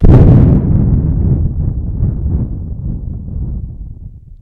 Quite realistic thunder sounds. I've recorded this by blowing into the microphone.
Lightning, Thunder, Storm, Thunderstorm, Weather, Loud